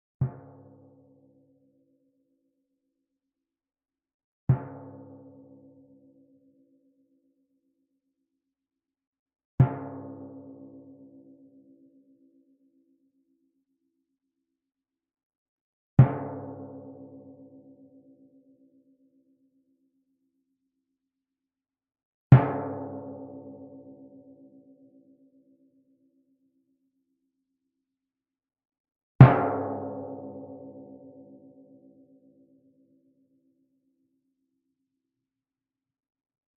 timpano, 64 cm diameter, tuned approximately to C#.
played with a yarn mallet, about halfway between the center and the edge of the drum head.
drum, drums, flickr, hit, percussion, timpani